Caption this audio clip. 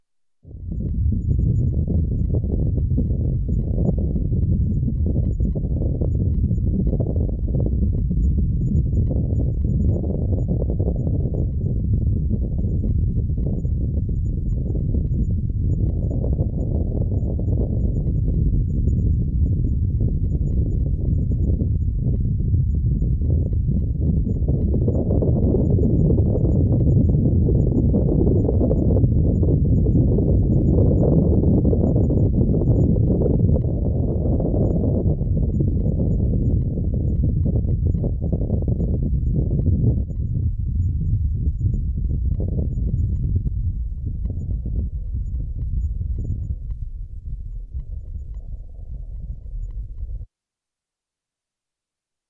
Earth tectonic movements
Lo rumble sound deep into the earth.
anxious, creepy, deep, drone, earth, effect, film, frightful, fx, haunted, horror, scary, sci-fi, sinister, sound, sound-design, sound-effect, soundboard, sounds, spooky, strange, terrifying, terror, thrill, weird